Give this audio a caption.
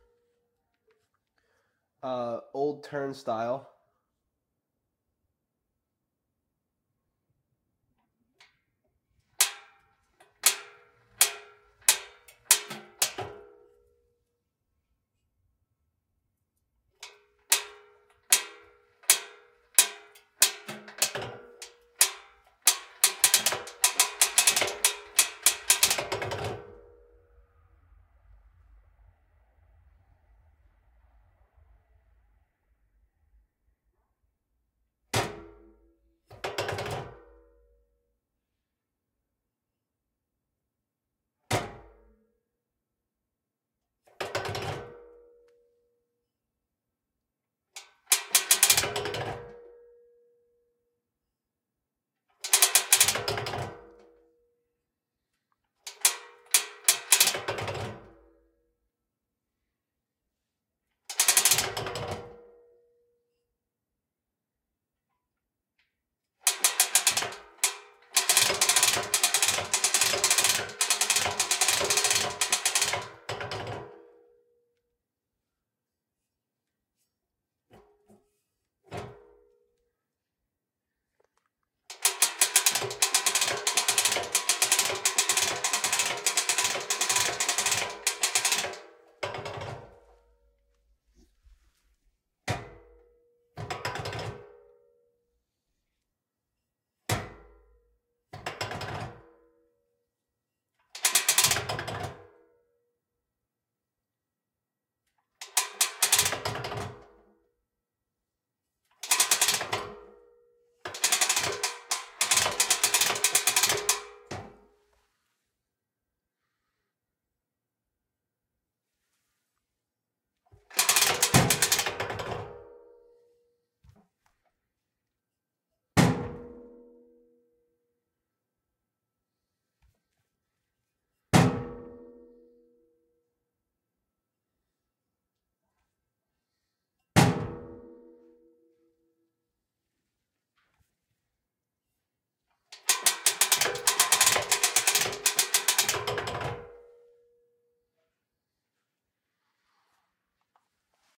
Turnstile RX
Weird old turnstile that I found at this place while I was booming for a movie. Hearty metallic ratcheting and clunking sounds.